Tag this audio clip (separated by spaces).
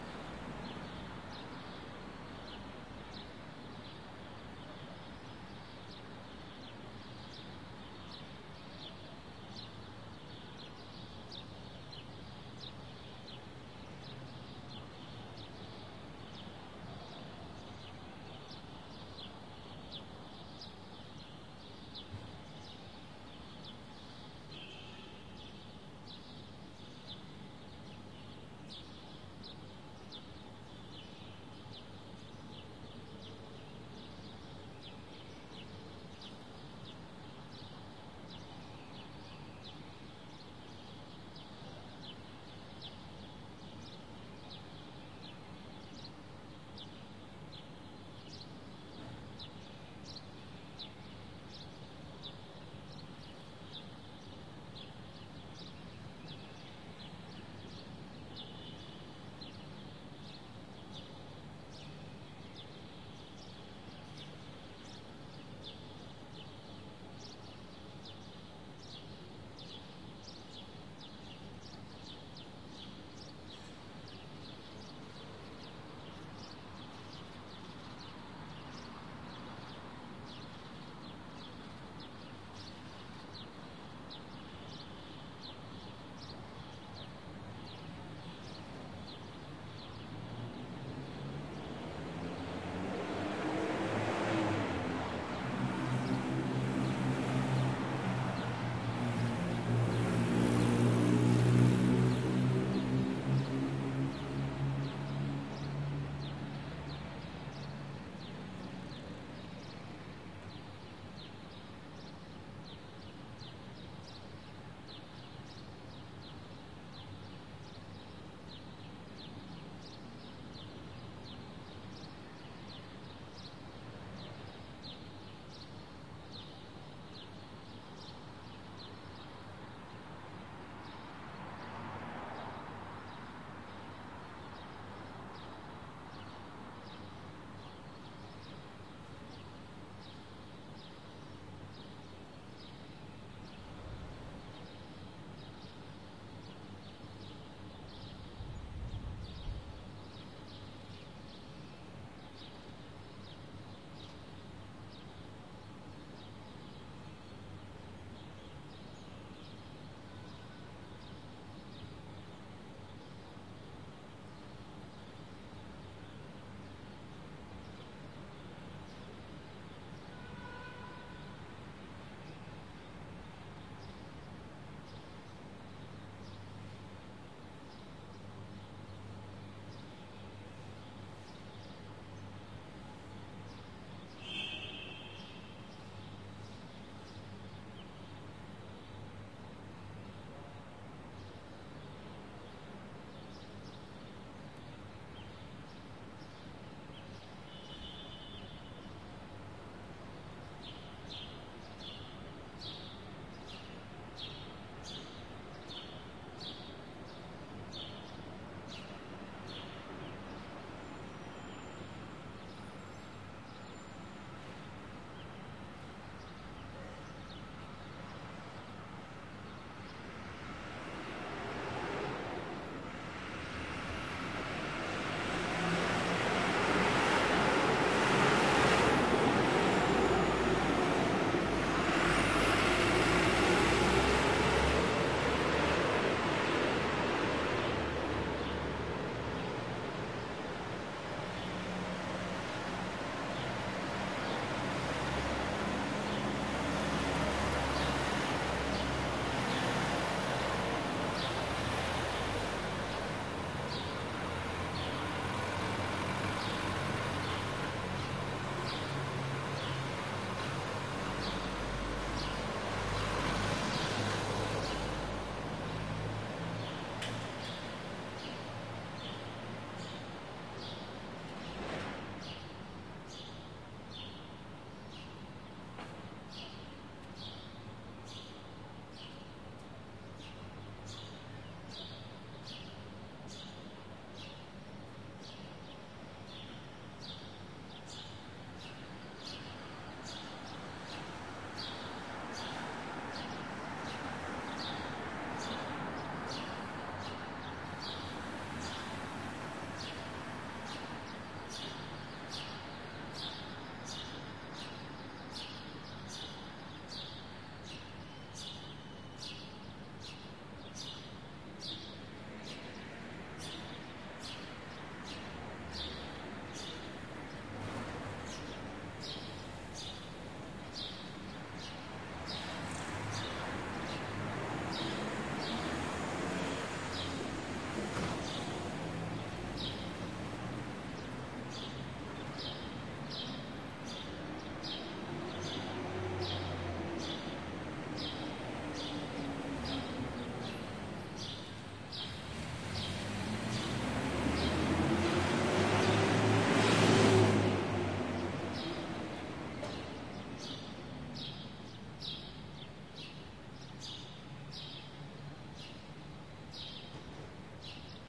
Ambiance Beirut Birds car City Horizon light Spring traffic